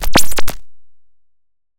Attack Zound-191
A variation on "Attack Zound-190". This sound was created using the Waldorf Attack VSTi within Cubase SX.